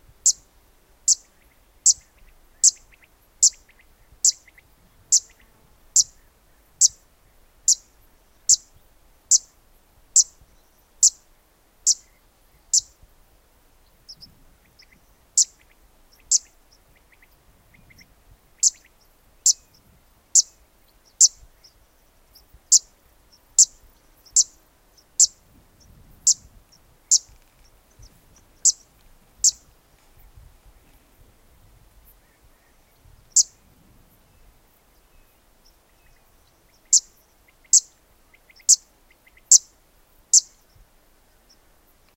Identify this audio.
20060628.bird.scrub.02
chirps (foreground) of an unknown bird, along with other species in background. Recorded in Mediterranean scrub with Sennheiser ME66 > Shure FP24 > iRiver H120 (rockbox). EDIT: the chirps are obviously from a Fan-tailed Warbler, Cisticola juncidis
donana, Cisticola-juncidis, quail, field-recording, scrub, fan-tailed-warbler, summer, warblers, birds, nature